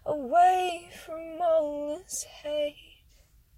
'Away from all this hate'
A female voice singing a line that could be used in a song. :) (Sorry for lack of detail, I'm pretty busy nowadays)